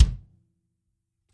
Breathing Kick
This is a free one hit sampler of my "Breathing" drum kit samples. Created for one of my video tutorials.